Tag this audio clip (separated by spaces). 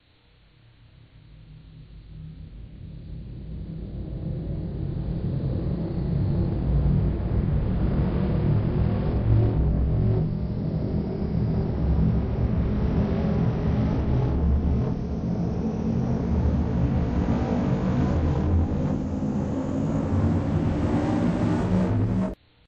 spaceship laser digital